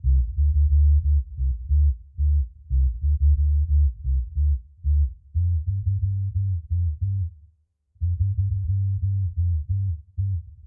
Don Gorgon (Bass)
Don Gorgon F 90.00bpm (Bass)
Rasta, Reggae, Roots